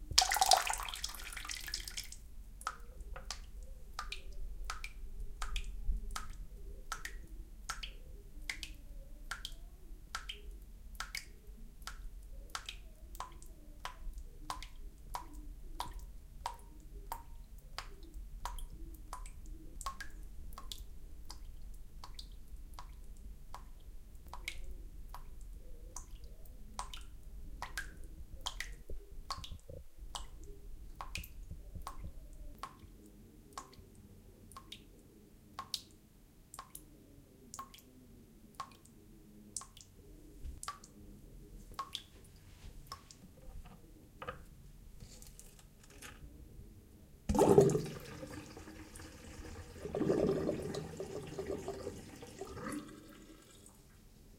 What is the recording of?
Tap leaking / dripping into wash basin filled with water;
recorded in stereo (ORTF)